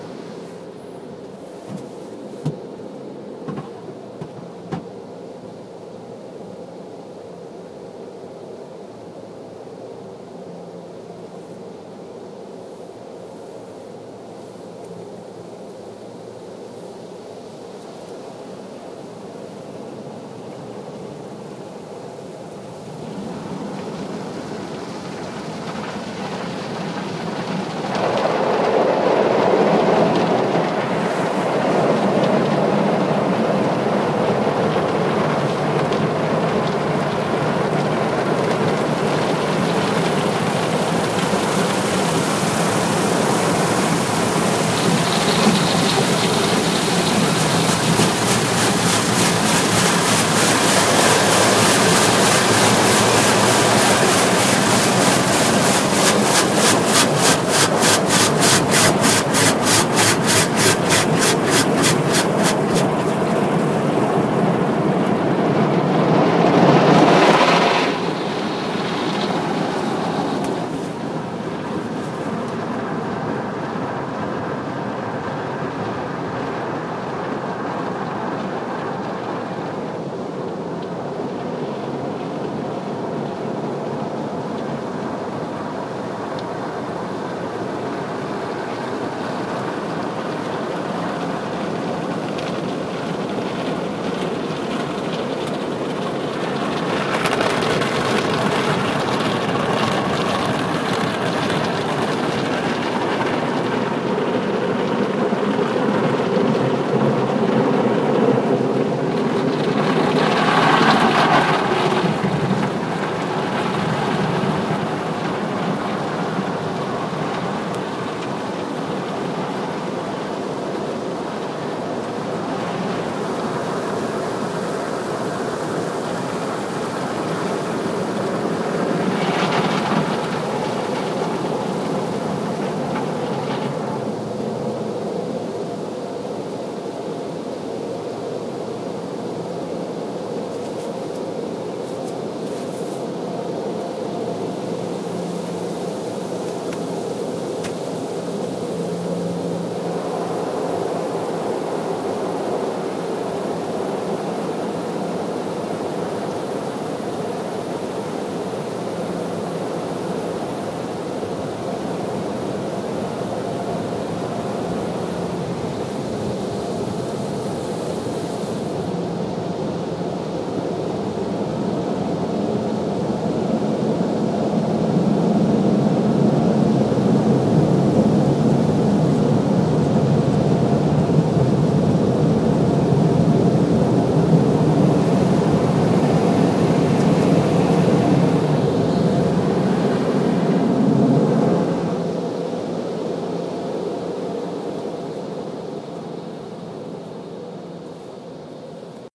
Recorded this inside a car. An attempt to record a car wash. Recorded with an iPhone using Voice Memos.
car, wash, auto, clean, cleaning, brush